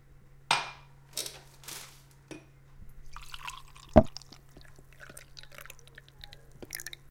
juice glass 1
This sound is part of the sound creation that has to be done in the subject Sound Creation Lab in Pompeu Fabra university. It consists on the sound of pouring the organge juice from the jar to the glass.
juice, drink, UPF-CS14, liquid, orange